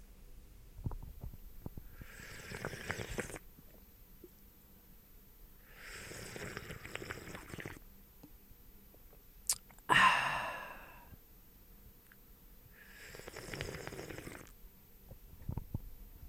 This is a simple audio recording of someone sipping a beverage.